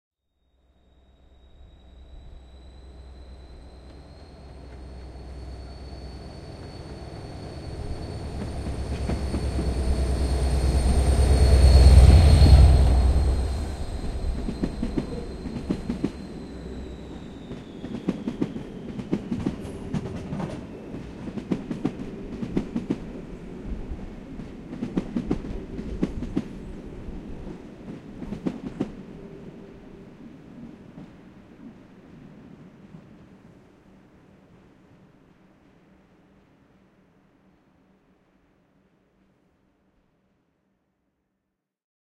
a stereo sample of a train
recorded in "gare de Tours, France" with a minidisc portable recorder and the ECM-MS907 microphone